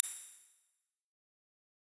handdrawn IRexperiment2
hand-drawn, response, sample, impulse